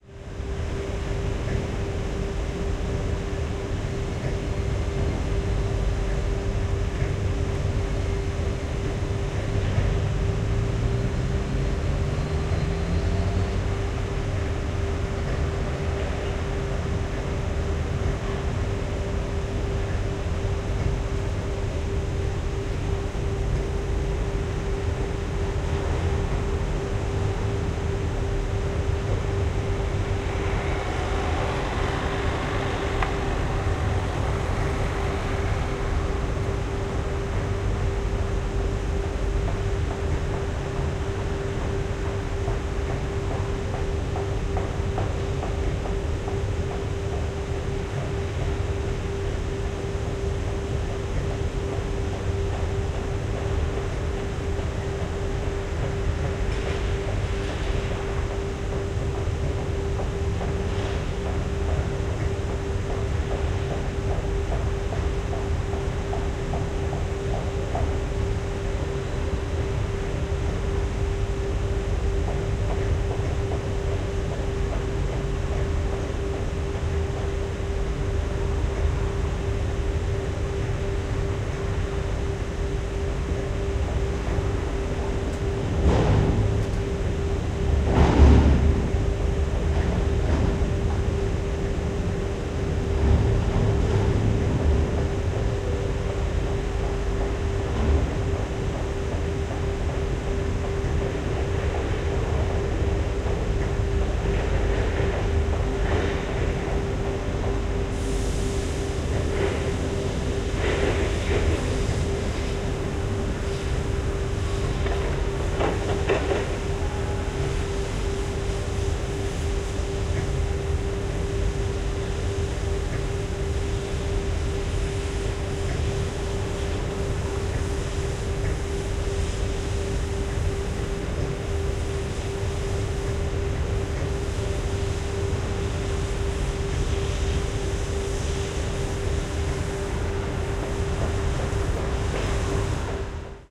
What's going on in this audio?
Fortunately I don't live nearby, but not far from home there is a factory that manufactures motorhomes, that's the atmosphere, made of machines, hammer noise blowers and all kinds of activity.
factory, tunnel